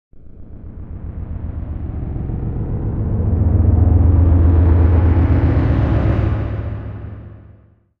This sample is part of the "SteamPipe Multisample 1 Mechanical" sample
pack. It is a multisample to import into your favourite samples. The
sample is a sound that in the lower frequencies could be coming from
some kind of a machine. In the higher frequencies, the sound deviates
more and more from the industrial character and becomes thinner. In the
sample pack there are 16 samples evenly spread across 5 octaves (C1
till C6). The note in the sample name (C, E or G#) does not indicate
the pitch of the sound but the key on my keyboard. The sound was
created with the SteamPipe V3 ensemble from the user library of Reaktor. After that normalising and fades were applied within Cubase SX & Wavelab.